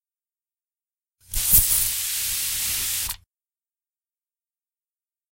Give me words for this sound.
Me spraying a bug. No bugs were harmed! Applied Pitch Bend, Tempo and Noise Reduction. Recorded on Conexant Smart Audio and AT2020 USB mic, processed with Audacity.